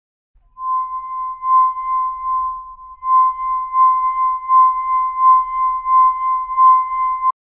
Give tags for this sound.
ringing resonance wineglass glass

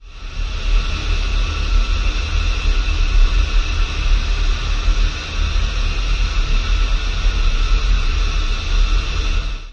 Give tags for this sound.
background horrific scary atmosphere ambient daemon ambience horror ambiance evil darkness

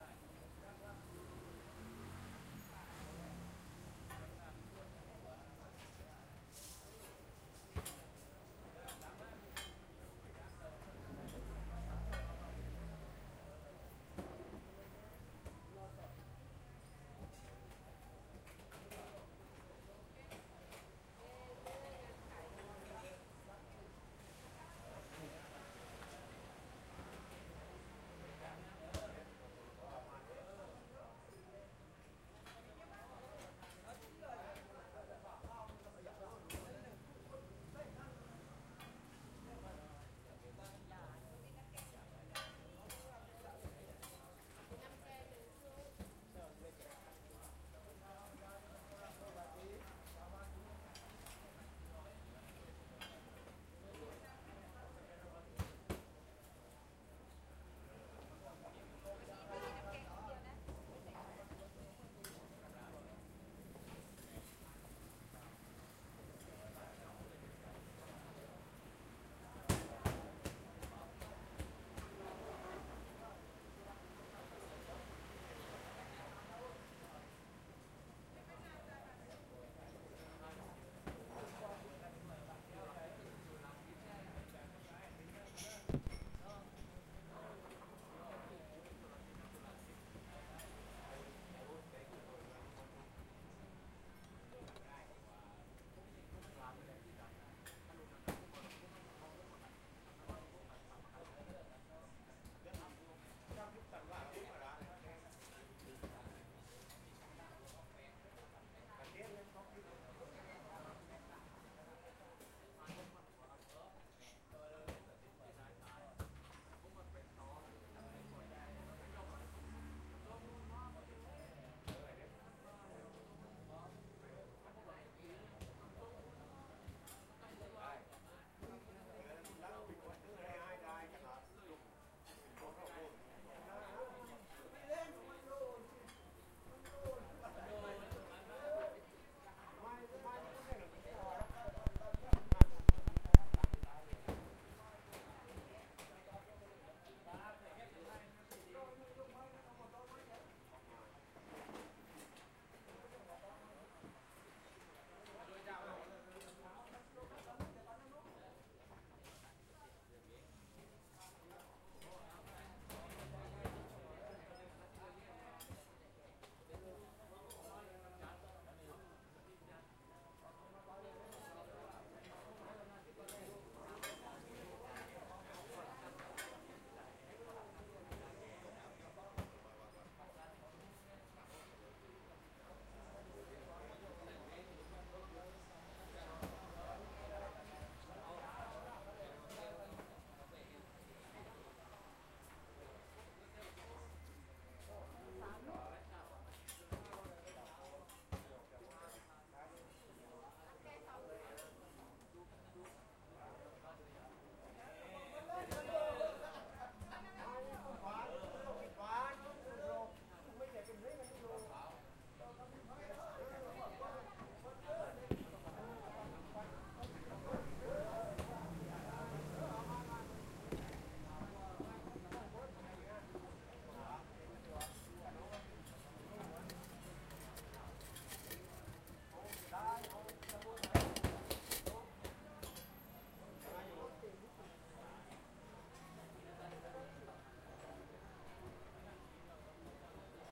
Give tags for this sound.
breakfast kitchen morning outdoor rotis street

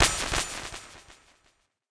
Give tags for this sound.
delay multisample one-shot synth

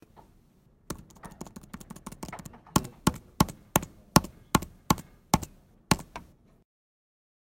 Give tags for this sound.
keystroke keyboard office

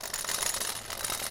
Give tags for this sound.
driving tire car

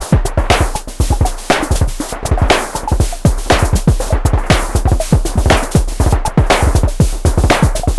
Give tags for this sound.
rhythmic drumloop electro 120bpm electronic loop

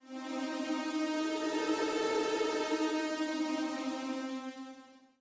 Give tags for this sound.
8bit cool game melodic retro sound

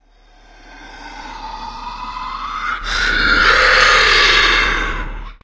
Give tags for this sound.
creepy demon devil evil ghost ghostly growl growling low-pitch nightmare scary sinister snarl snarling spooky

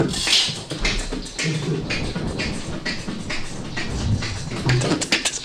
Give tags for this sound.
beat beatbox fade

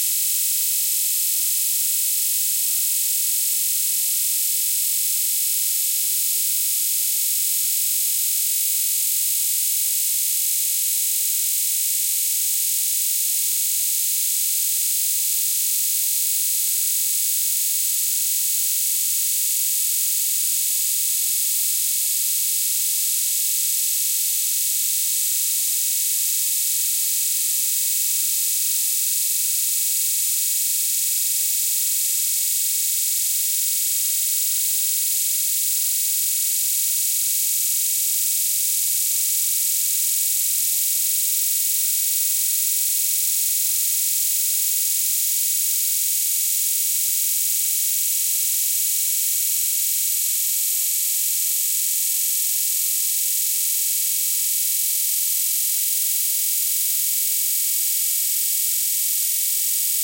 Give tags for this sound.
concrete fft noise planet resonance

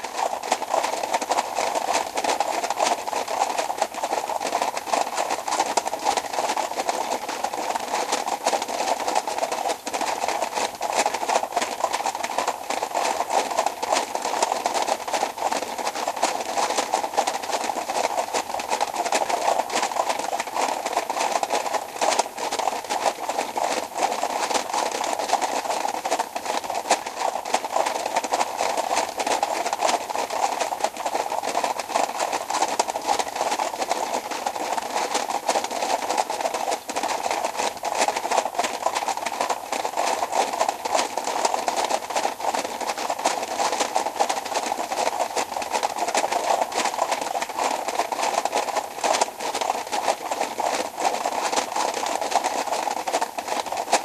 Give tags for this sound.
hooves horses clop

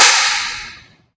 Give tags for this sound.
reverb convolution response ir impulse

